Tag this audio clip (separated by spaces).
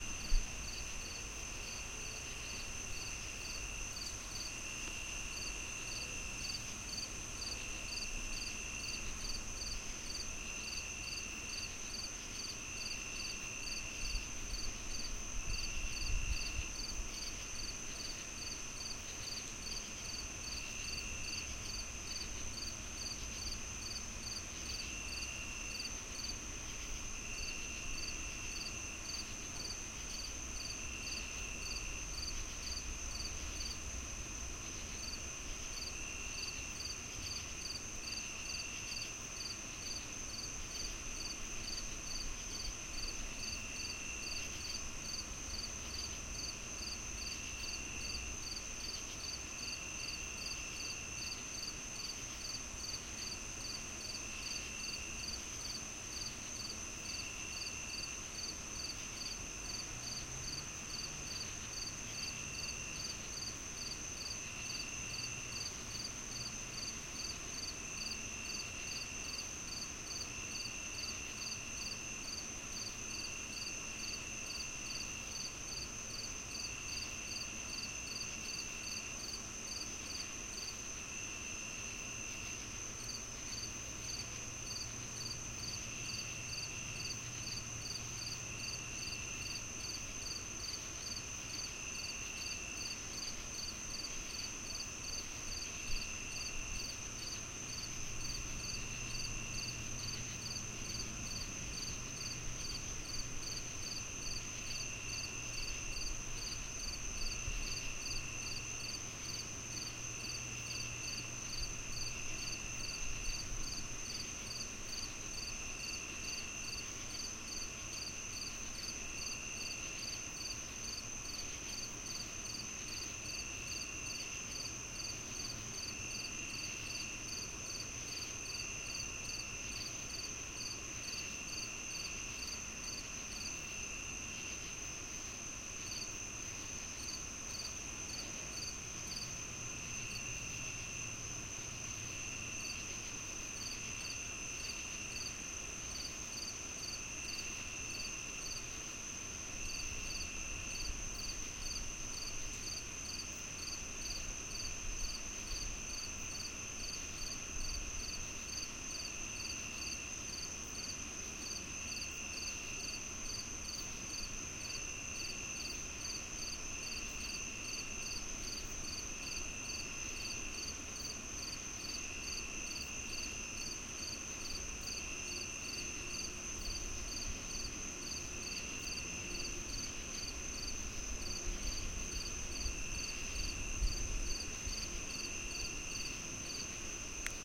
cricket field-recording Lavalieres night outdoor street suburban